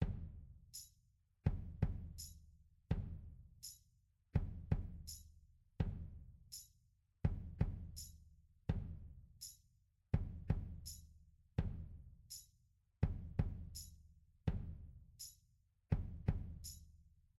Kick and Tambourine 83 bpm
85bpm, bass, beat, dance, dead, drum, drums, loop